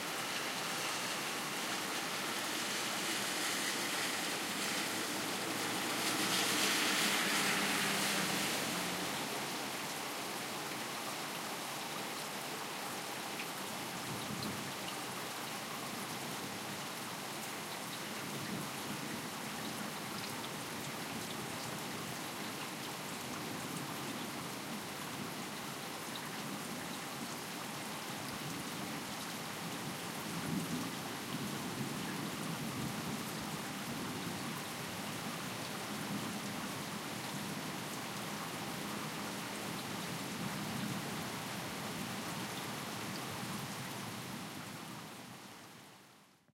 Rain and slight thundering
Rain and very very subtle, distant thundering, a car passing by.
car, nature, rain, field-recording, thunder